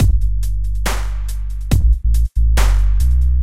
On Rd loop 7
The 7th unused loop in our collection. Has a dupstep'ish pace with a clap after every 8 bars. I have added a VERY basic bass line.
bass, drum, 8-bars, on-rd, on-road, 140-bpm